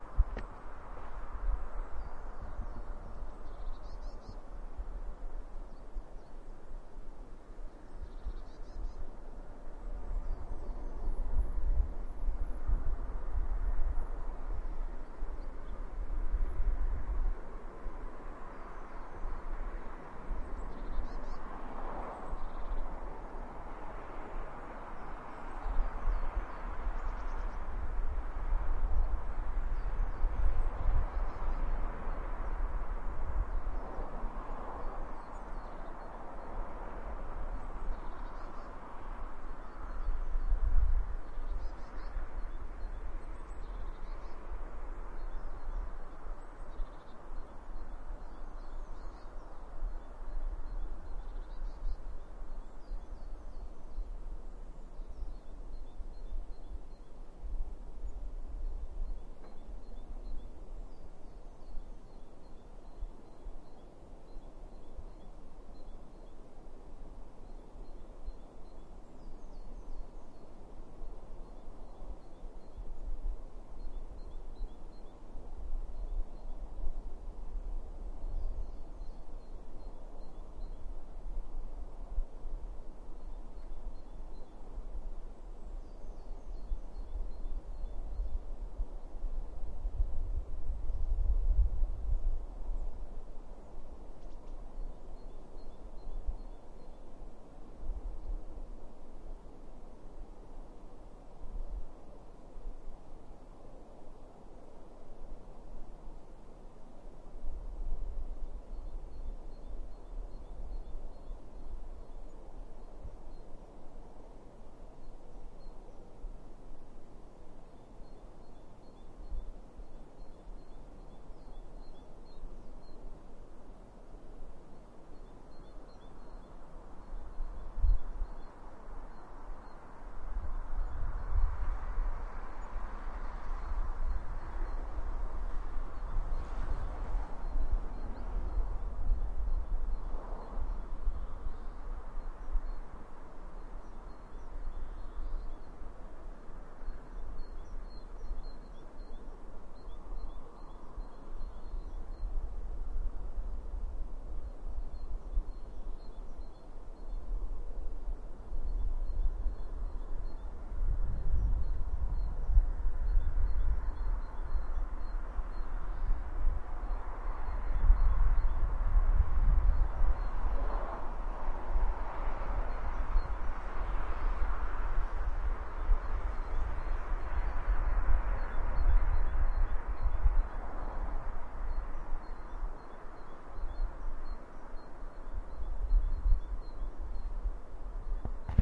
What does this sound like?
Birds & traffic
Birdsong and sounds of passing cars with a natural reverb. Some wind rumble. Recorded with a Zoom H1.
field-recording, traffic